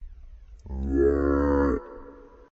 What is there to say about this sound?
It sounds like "yart" the disowned cousin of "yeet." A good sound for a fail or a "huh?" It also sounds like a cow or a frog.
mistake, what, fail, oops-noise, um, yart, confused, huh, bullfrog, uh, cow, oops, error, oopsie